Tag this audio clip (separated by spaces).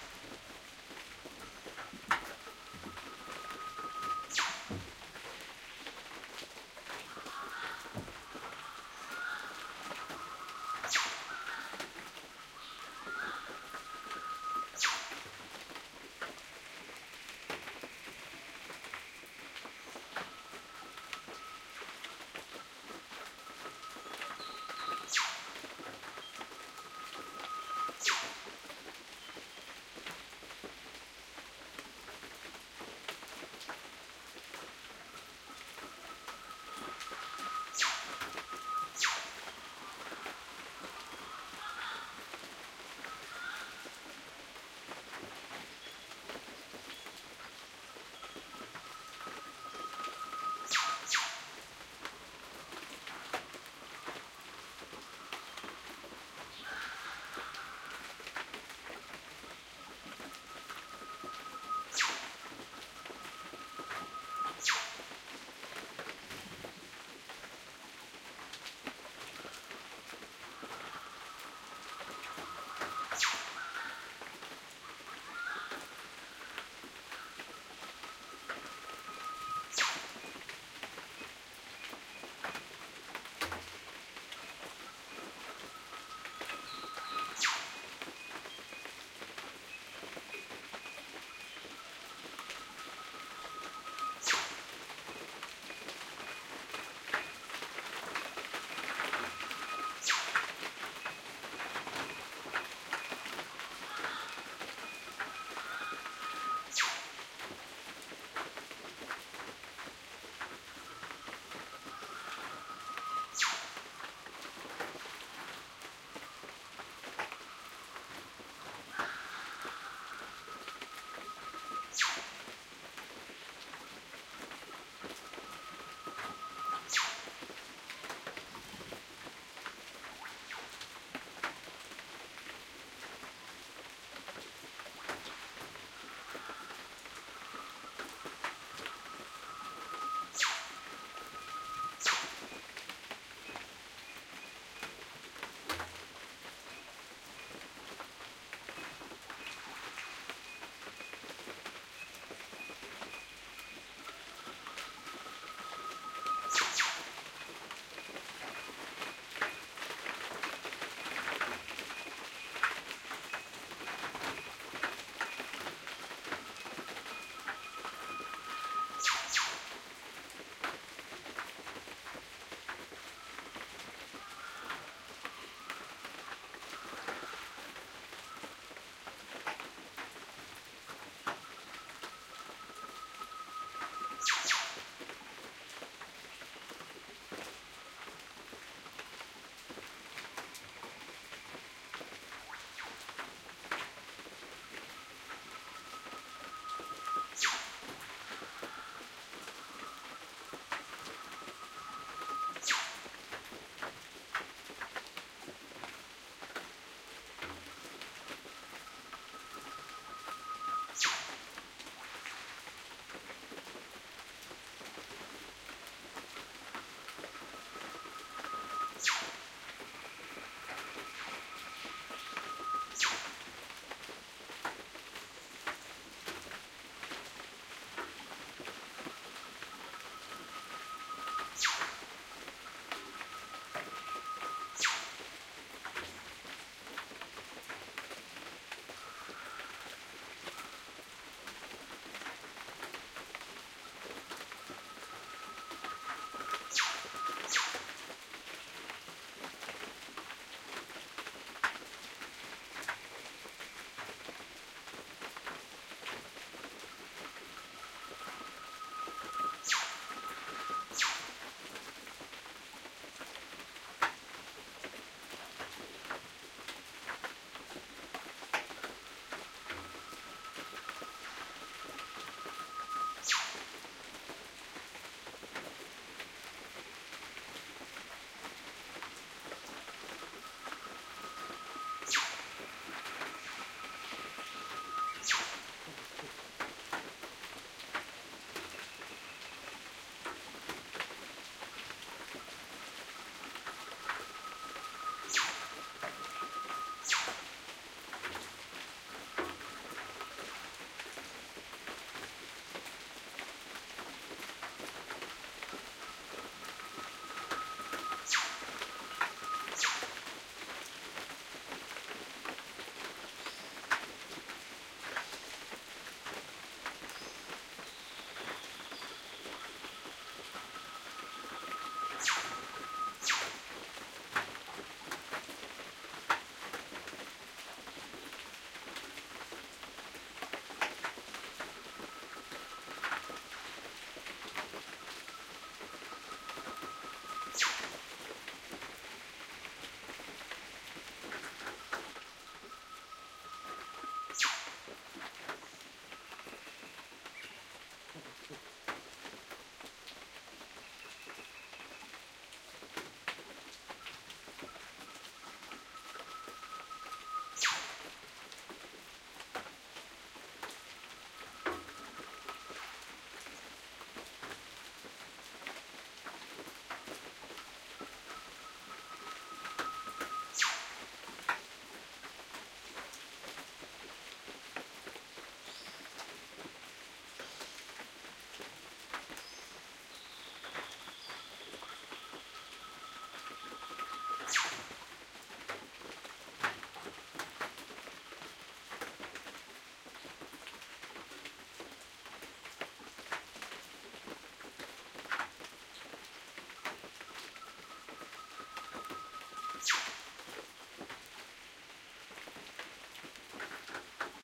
ambiance ambiant birds birdsong crater-lakes-rainforest-cottages field-recording humid outdoor rain rainforest tropical wet whipbird